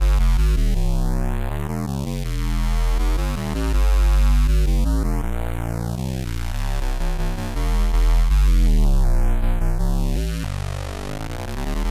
8 bars of square bass, flanged, good for a hip hop or d&b bassline.....